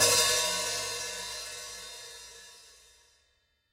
hihat open3
X-Act heavy metal drum kit. Zildjian Avedis Quick Beat 14". All were recorded in studio with a Sennheiser e835 microphone plugged into a Roland Juno-G synthesizer. Needs some 15kHz EQ increase because of the dynamic microphone's treble roll-off. I recommend using Native Instruments Battery to launch the samples. Each of the Battery's cells can accept stacked multi-samples, and the kit can be played through an electronic drum kit through MIDI. Excellent results.